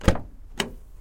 car door O1
car, close, closing, door, open, opening, trunk